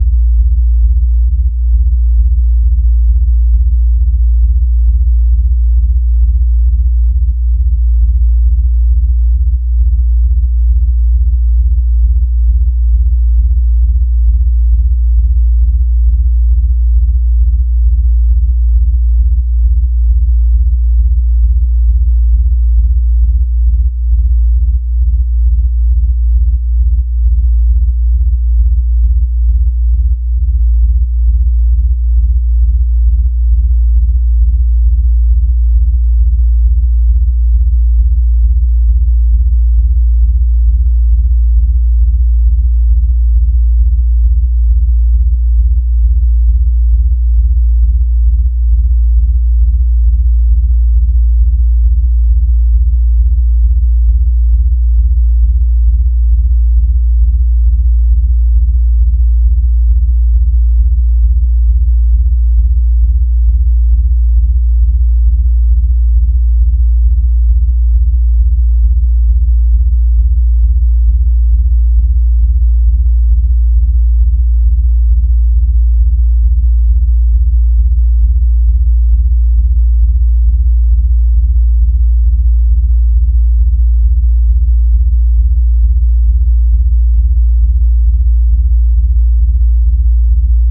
system 100 drones 3
A series of drone sounds created using a Roland System 100 modular synth. Lots of deep roaring bass.